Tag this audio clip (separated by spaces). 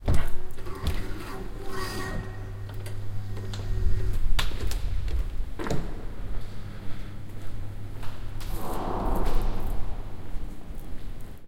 Automatic-Door Central-Station Front-Door Germany Grinding Noise Rostock